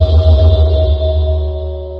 China Dream Convoloop m-07
This rhythmic drone loop is one of the " Convoloops pack 03 - China Dream dronescapes 120 bpm"
samplepack. These loops all belong together and are variations and
alterations of each other. They all are 1 bar 4/4 long and have 120 bpm
as tempo. They can be used as background loops for ambient music. Each
loop has the same name with a letter an a number in the end. I took the
This file was then imported as impulse file within the freeware SIR convolution reverb and applied it to the original loop, all wet. So I convoluted a drumloop with itself! After that, two more reverb units were applied: another SIR (this time with an impulse file from one of the fabulous Spirit Canyon Audio CD's) and the excellent Classic Reverb from my TC Powercore Firewire (preset: Deep Space). Each of these reverbs
was set all wet. When I did that, I got an 8 bar loop. This loop was
then sliced up into 8 peaces of each 1 bar. So I got 8 short one bar
loops: I numbered them with numbers 00 till 07.